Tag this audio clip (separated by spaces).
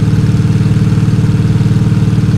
Car Motor Engine Truck